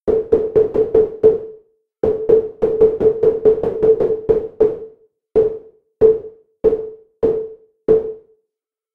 Envelope Attack Decay + Filtro Passa Banda - Pure Data
esempio di utilizzo del modulo ead in pure data + band pass filter
percussive, fx, sound